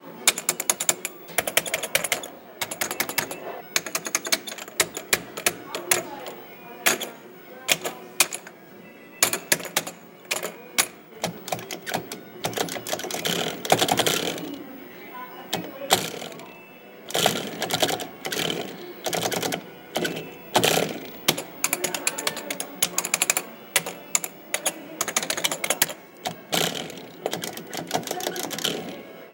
Street Fighter Arcade buttons and Joystick.